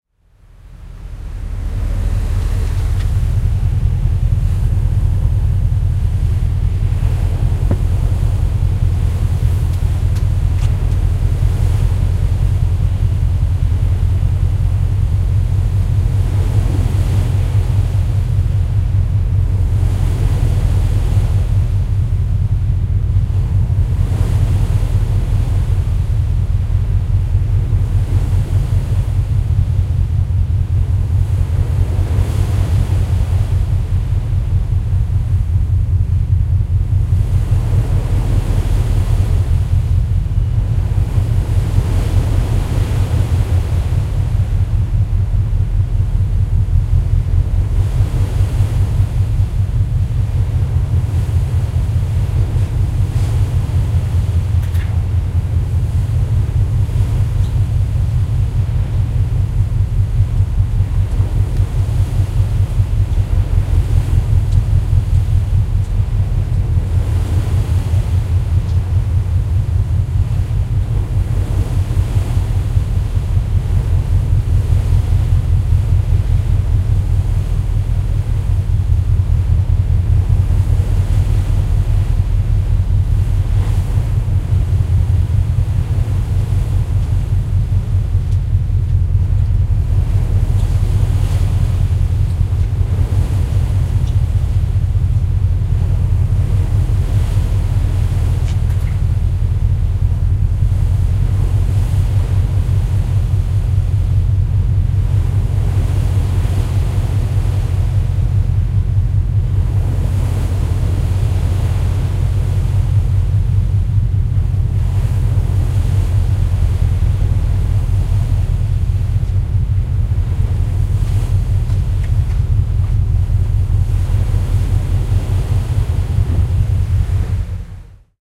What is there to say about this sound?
Inside Passage 1
Recorded on the BC Ferry - Inside Passage Route August 2017 using an Zoom H4n. The audio sounds unbalanced.